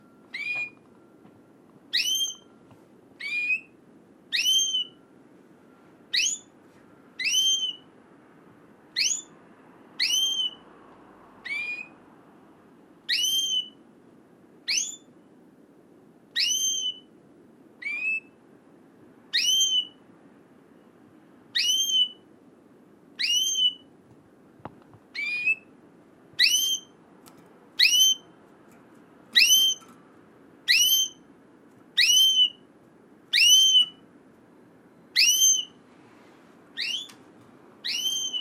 FX - piar pajaro domestico